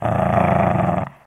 Dog Shih Tzu Growling 03
Shih Tzu dog, growling